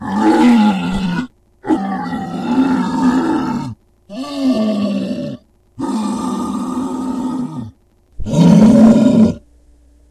scrapped troll sounds
Old troll noises that were scrapped in later versions of Mortila (Hexen mod)
growl, troll, creature, beast, monster, roar